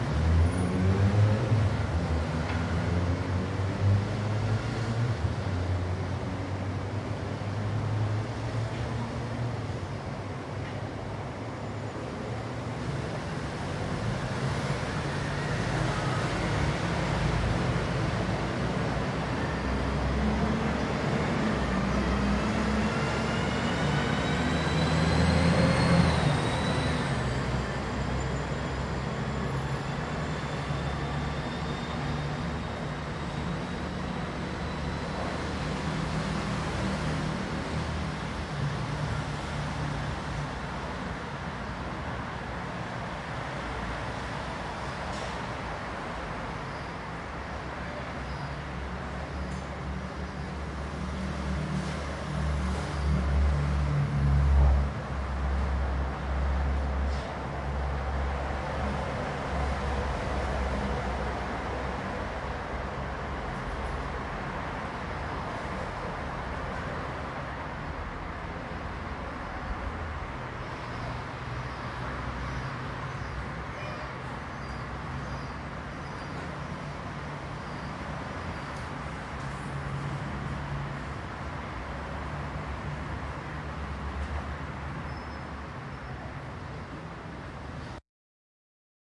A busy city street, in Athens, Greece recorded from the 6th floor. I used a Zoom H1 stereo recorder.